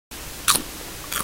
Chrunchy sound effect
A satisfying sound of eating chips
ASMR, Chewing, Chips, Crunchy, Satisfying